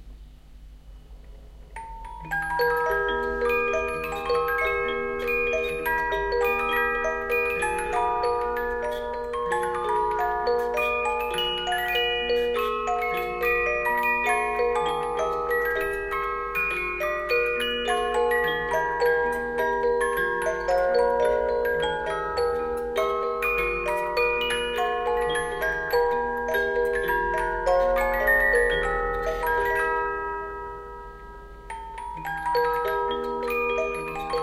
Symphonion Wiener Blut
About 100 year old music-box Symphonion playing the tune "Wiener But". Recording devices: Edirol R-09, OKM II stereo microphones.